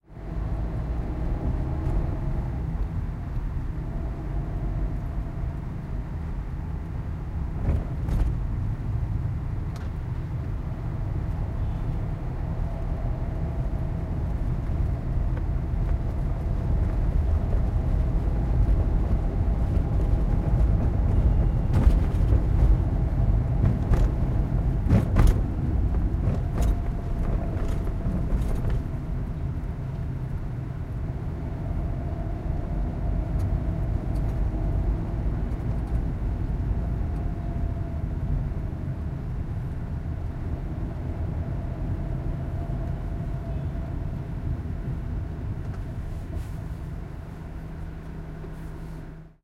Car interior traffic 2
Sounds recorded from roads of Mumbai.
India, Mumbai, field-recording, road